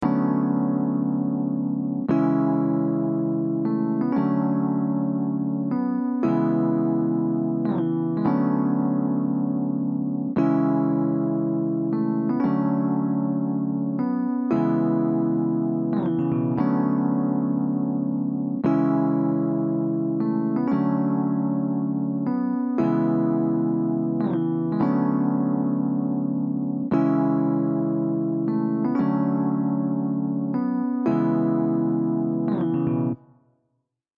raspberry- epiano riff 5
Chords are Dmaj7/13, C#m, Dmaj7, C#m7. 116 bpm.
Advanced, Chill, Chords, E-Piano, Electric, Piano, Preset, Progression, Soft, Tempo